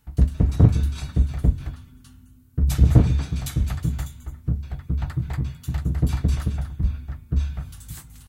various mysterious noises made with wire iron pieces. Sennheiser MKH60 + MKH30 into Shure FP24, PCM M10 recorder